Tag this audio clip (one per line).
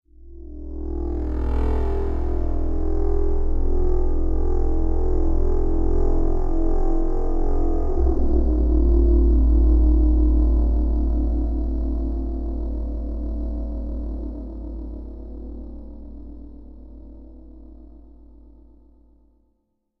motorbike
passing
motorcycle
distant
pass-by
simulation